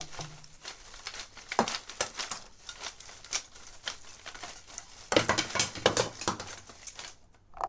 Sticks jostled in hands. Some fall to the ground. Recorded indoors with AudioTechnica Condenser microphone